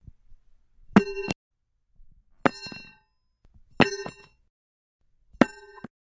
TELV 152 Metal Tool Drop
metal tools hitting ground
disarm, floor, hit, iron, metal, sword